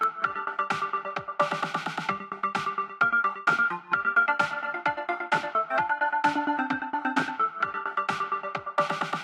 Stereo wave
soundscape, Music, Stereo, space